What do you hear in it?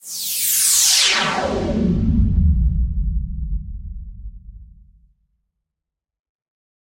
swish/whoosh
Noise whoosh sound made in Serum with external FX added
air, attack, booster, plane, swash